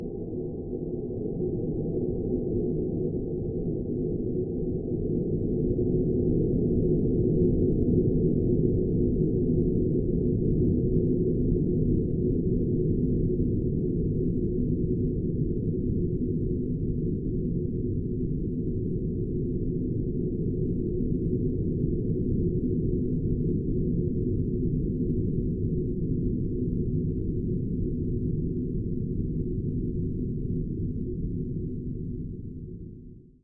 Deep Space
Sonido simulado del espacio exterior
dark, electro, soundscape, pad, atmosphere, universe, suspence, soundesign, processed, horror, alien, sci-fi, sound-design, space, electronic, deep, thrill, fx, ambient, synth, music, experimental, drone, ambience, delay, cinematic, effect, film, noise